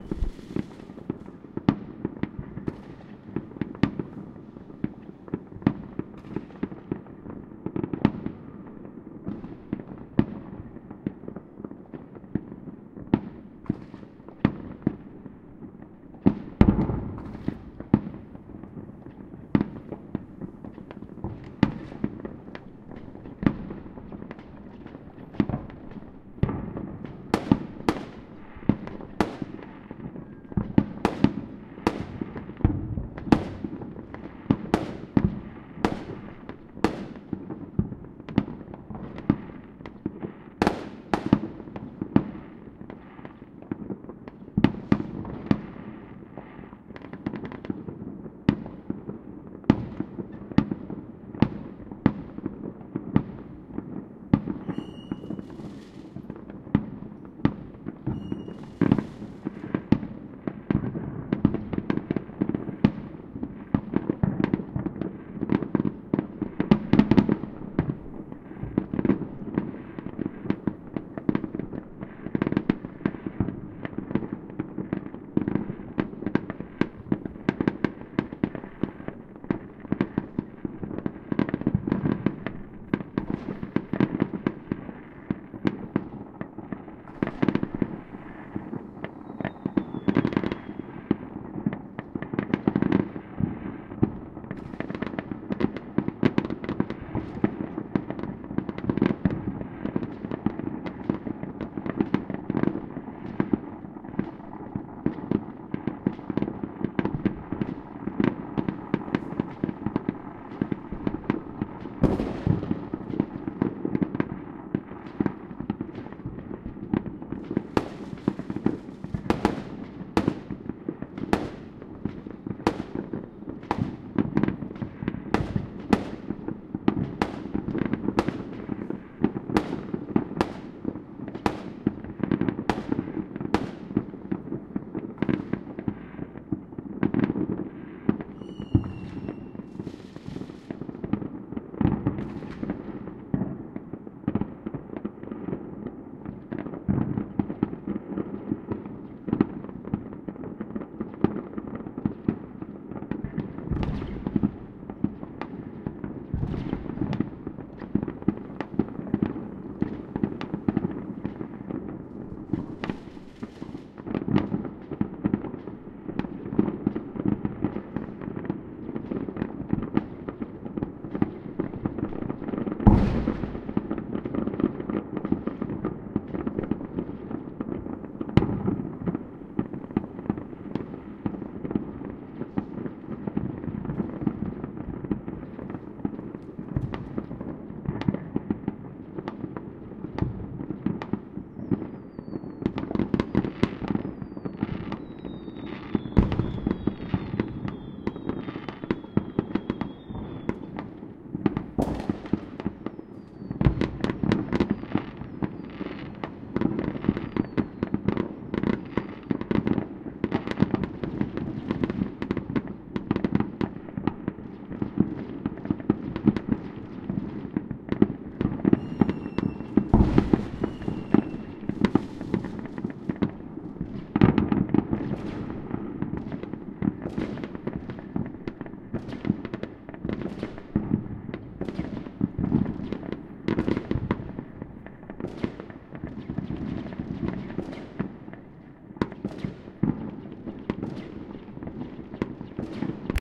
Fireworks Distant
Fireworks in the distance.
new-year, newyear, bang, pyrotechnics, atmosphere, celebration, explosions, fireworks, firework